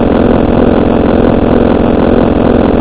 Lowfreq. static }loopable{